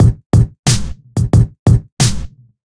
90 Atomik standard drums 02
fresh bangin drums-good for lofi hiphop
drums, free, sound, series, hiphop